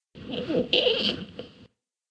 The Big Squeak (11) Comic 2
Okay, about this small bibliothek there is a story to tell.
Maybe a year ago my mother phoned me and asked if I could give her a hand because the door to her kitchen was squeaking.
After work I went to her, went down to the cellar, took the can with the lubricating grease, went upstairs and made my mother happy.
Then I putted the grease back and went upstairs again. Whe sat down, drank a cup of coffee. Then I had to go to the toilet and
noticed that the toilet door was squeaking too. So I went down to the cellar again and took once again the grease.
Now I thought, before I make the stairs again, I'll show if any other thing in my mothers house is squeaking.
It was terrible! I swear, never in my entire life I've been in a house where so many different things were squeaking so impassionated.
First off all I went back to my car and took my cheap dictaphone I use for work. And before I putted grease on those squeaking things I recorded them.
comic, creak, creaking, creaky, game, moving, slapstick-sounds, squeak, squeaking